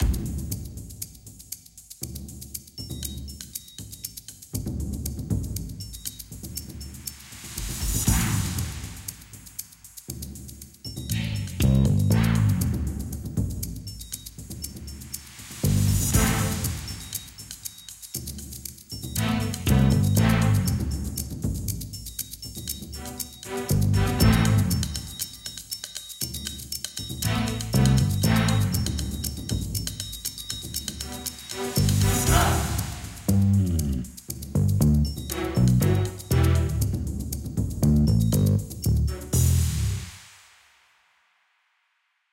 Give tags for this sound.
scene spy

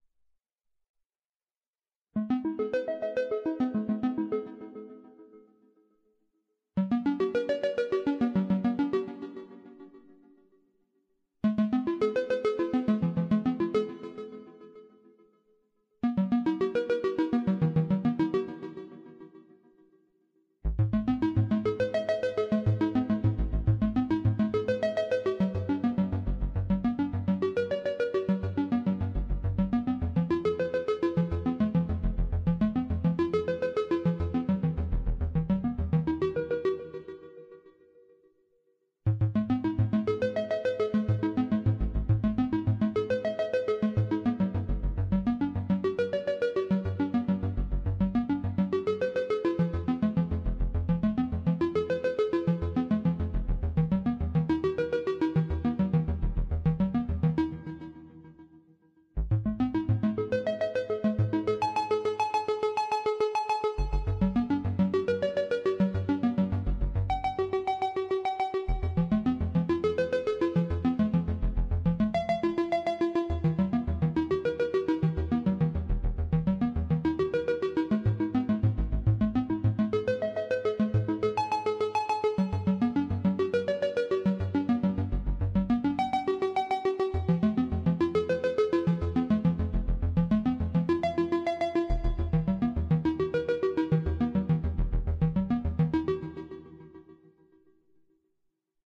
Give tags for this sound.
loop music background ambient soft

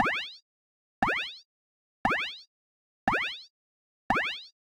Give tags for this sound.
beat laser loop song sound

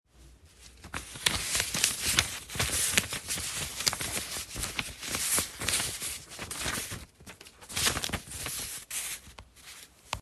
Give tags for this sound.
sheets papers hasty

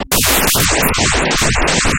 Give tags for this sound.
additive digital noise synth synthesis synthesizer synthetic weird

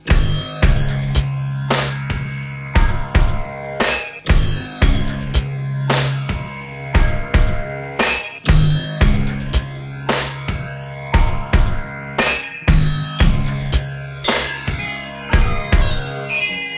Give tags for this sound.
creepy spooky thrill

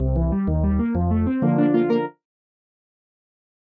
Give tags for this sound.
bass end fanfare finish game games level synth video video-game videogame win yay

